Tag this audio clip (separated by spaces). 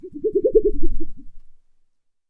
drum effect wha hit plastic percs pad dance percussion-loop SFX groovy wobble